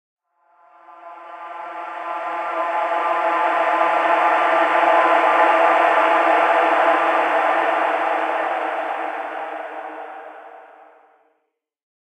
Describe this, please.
Project On Hold
Gaspy and airy, a breath-like pad.
breath, dark